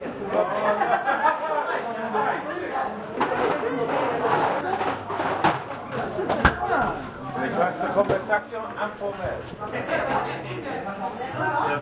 French bar f
Noisy French bar during Euro 2008.